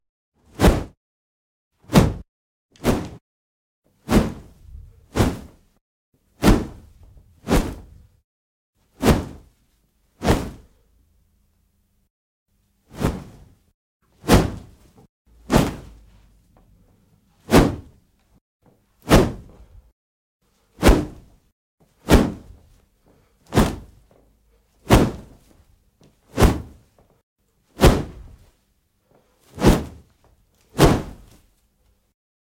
Powerfull Whooshes 1
Processed whoosh recordings for your motion graphic, fight scenes... or when you just need a little whoosh to you sound design :) Add reverb if needed and it's ready to go.
If you use them you can send me a link.